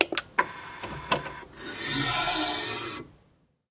MAC LABS CD TRAY 009
We were so intrigued by the sound that we felt we had to record it. However, the only mics in our collection with enough gain to capture this extremely quiet source were the Lawson L251s with their tube gain stage. Samples 15 and 16, however, were captured with a Josephson C617 and there is a slightly higher noise floor. Preamp in all cases was a Millennia Media HV-3D and all sources were tracked straight to Pro Tools via Frontier Design Group converters. CD deck 'played' by Zach Greenhorn, recorded by Brady Leduc.